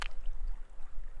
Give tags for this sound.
bang nature rocks

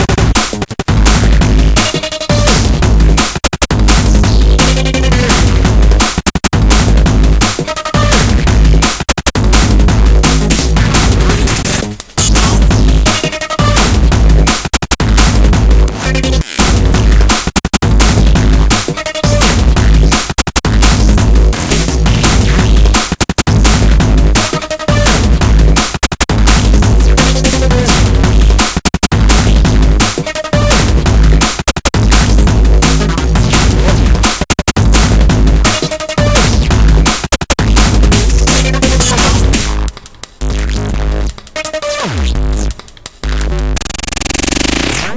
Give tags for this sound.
groove dnb distorted beat 170bpm hard loop drumnbass bass

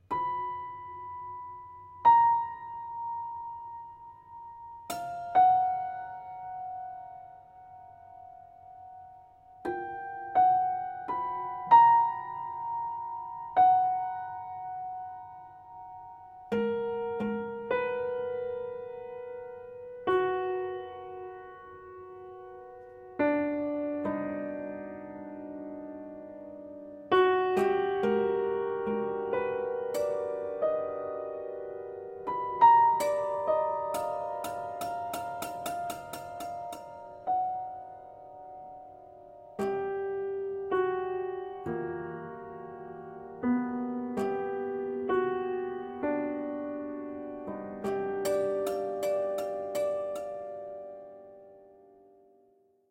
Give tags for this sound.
ambiance improvisation piano prepared

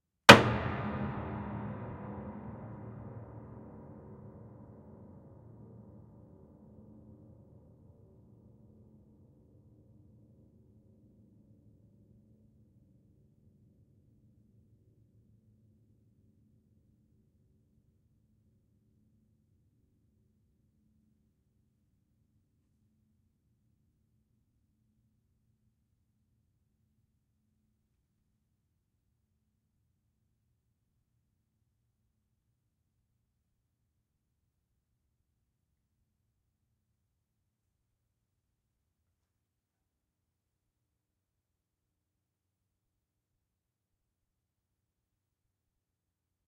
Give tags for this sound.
acoustic effect fx horror industrial percussion piano sound soundboard sound-effect

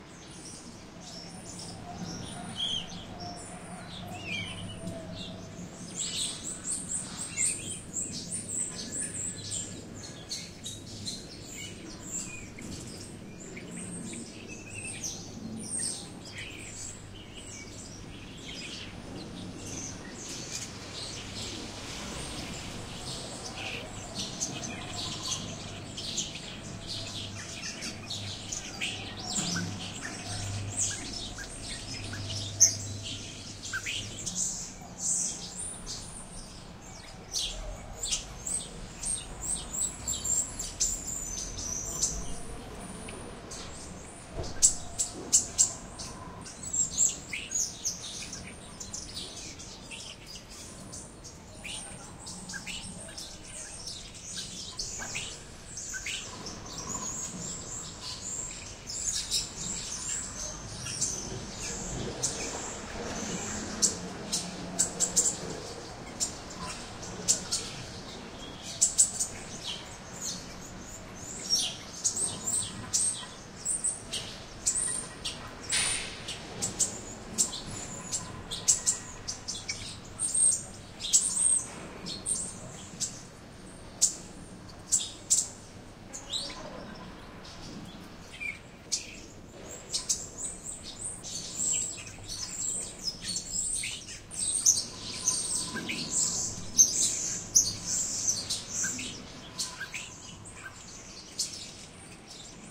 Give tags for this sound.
Birds Environmental Bird City field-recording Chirping

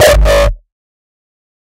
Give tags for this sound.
kick hardstyle rawstyle

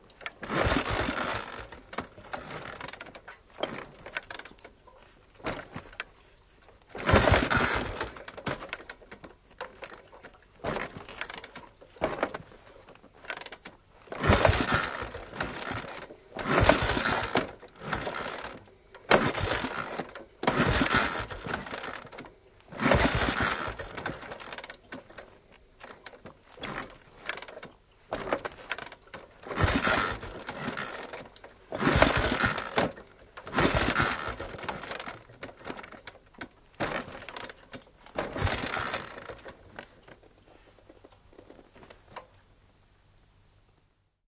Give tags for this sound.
Motorbikes Soundfx Yleisradio Finland Tehosteet Yle Finnish-Broadcasting-Company Field-Recording Suomi Motorcycling

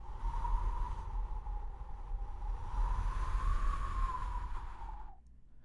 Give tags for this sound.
blow wind

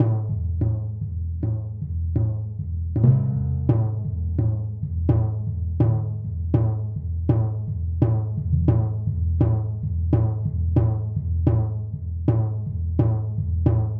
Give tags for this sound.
soundscape riff

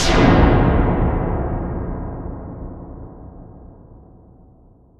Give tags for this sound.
Electronic Scifi Attack down Synth Synthesizer Sweep

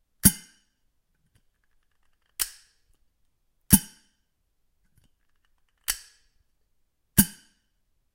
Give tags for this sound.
Percussion House Indoors Kitchen Home Cooking Household Foley

